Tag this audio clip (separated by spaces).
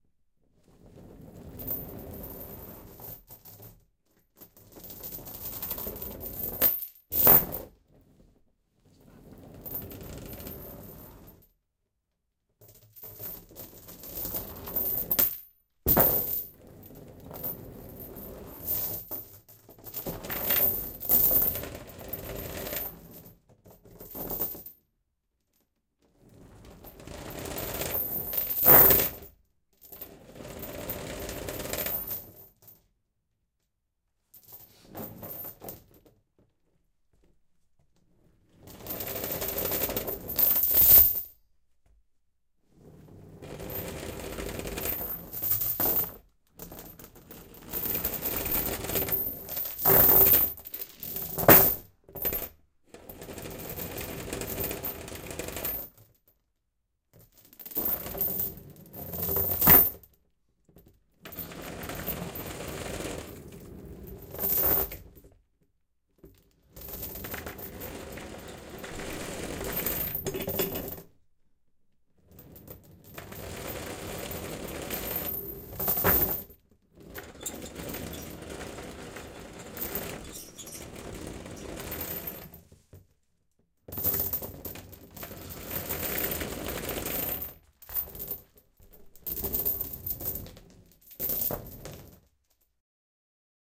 floor rusty chain quiet dark muffled dungeon drag metal squeak vent